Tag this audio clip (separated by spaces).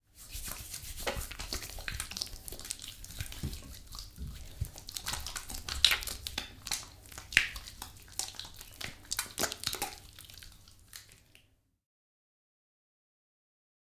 panska washing bath soap wash shower water sink cleaning hands bathroom cz